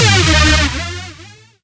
Classic rave noise as made famous in human resource's track "dominator" - commonly referred to as "hoover noises".
Sampled directly from a Roland Juno2.
roland, synth, juno2, samples, hoover